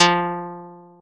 Plucked
Guitar
Single-Note
Guitar, Plucked, Single-Note